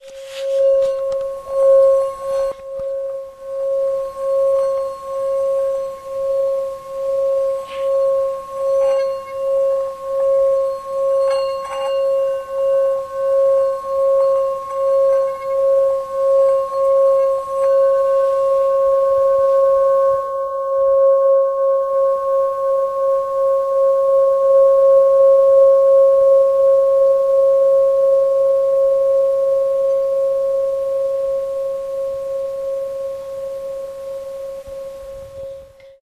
tibetan bowl3 251210
25.12.2010: about 14.00. my family home. the first day of Christmas. Jelenia Gora (Low Silesia region in south-west Poland).the tibetan bowl sound.
domestic-sounds, field-recording, instrument, tibetan-bowl, vibrate, vibration